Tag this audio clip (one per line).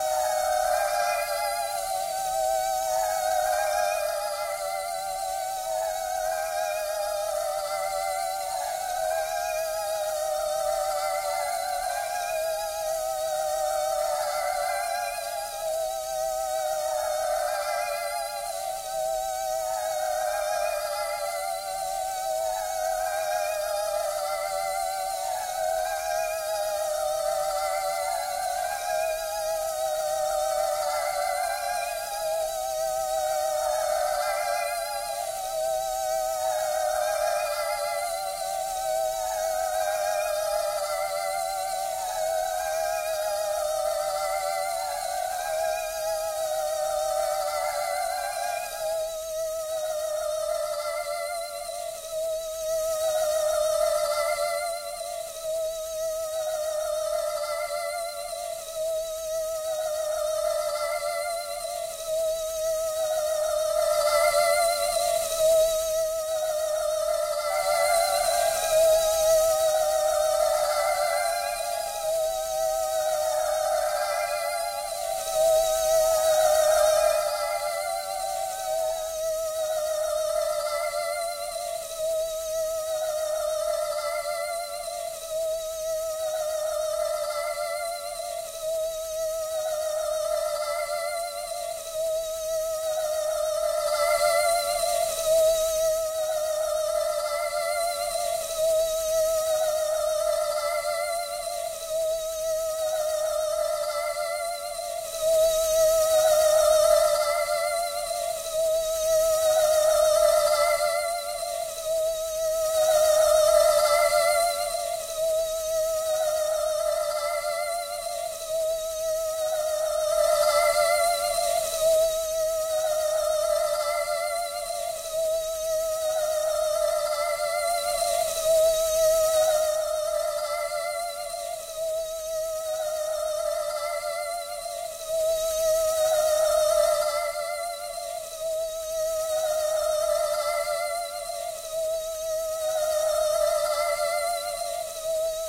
cat
granular
timestretch